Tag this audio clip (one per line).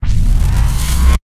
movement
sfx
transformer
technology
digital
sound-design
future
electric
sci-fi
effect
sounddesign
tech
mechanic
robot